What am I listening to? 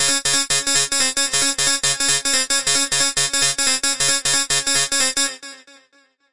28 ca dnb layers
These are 175 bpm synth layers background music could be brought forward in your mix and used as a synth lead could be used with drum and bass.
fx, background, lead, bass, layer, samples, drum, synth, layers, sample, multi